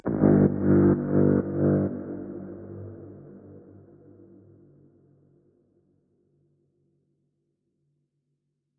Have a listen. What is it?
Fart Attack
Huge fart FX recorded from real fart just for fun. It has a little side-chain for more pumping!
Enjoy!
ambient, atmospheric, cinema, fart, farts, field-recording, fx, sample, sound-effect